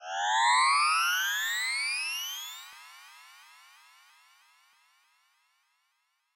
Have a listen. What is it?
Charge up
This a sound effect I created using ChipTone.